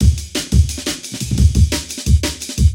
more amen amen amen with punch, and originality